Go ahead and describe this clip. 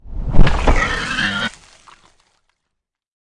troll head on a stick hit
You hit a monster with a kobold head on a stick!
From my short, free, artistic monster game.
drag, grunt, meaty, oink, pig, snort, squeal